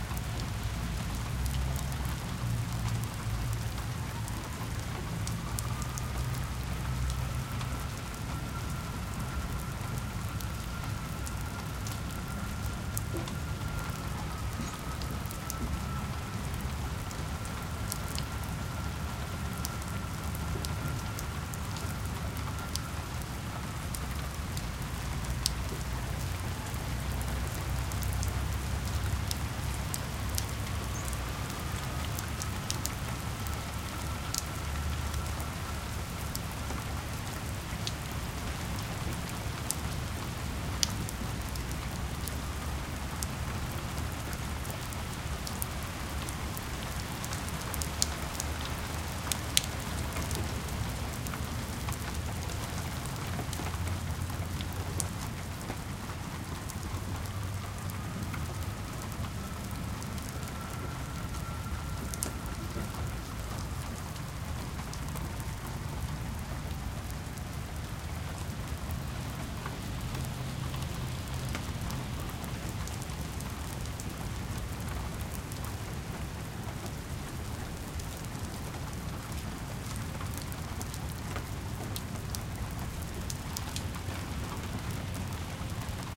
A light rain storm being recorded in the suburbs. Light city sounds can be heard as well as a distant siren.
dripping, nature, outdoor, rain, raining, rainy, shower, water